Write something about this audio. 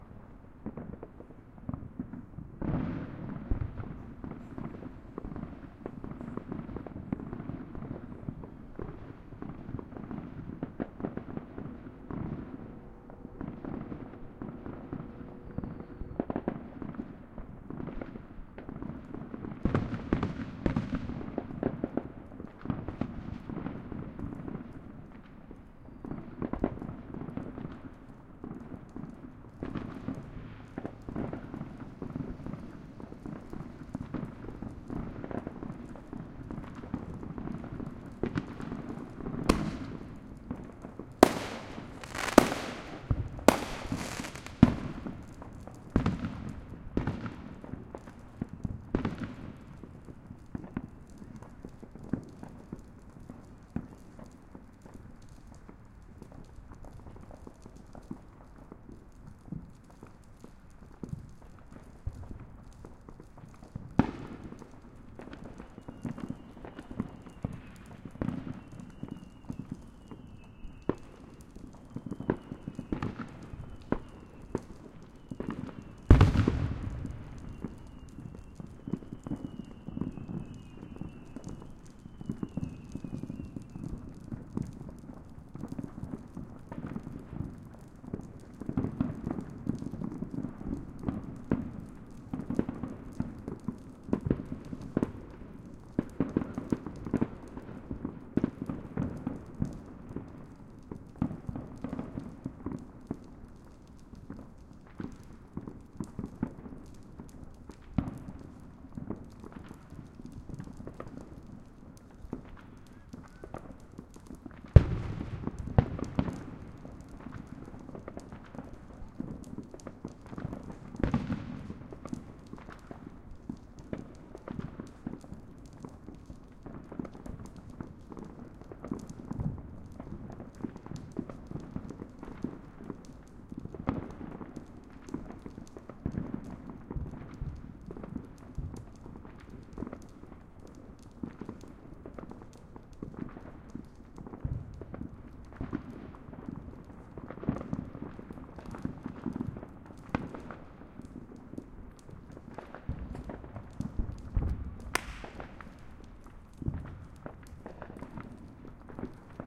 Warning: careful when listening, it gets loud!
July 4th. Recorded in the Midwest USA, where we adore our explosives. The omnis on my humble DR-05 did a pretty good job capturing the sheer depth of zillions of people blowing stuff up together :-).
You’ll hear: people having a party in the distance, a bonfire crackling on the right, a distant train blowing its whistle, and of course a lot of explosions. A couple of the big bangs clipped, but unsurprisingly, it made no audible difference.
It really wakes up when you apply some EQ to your liking. But I left it as is for everybody.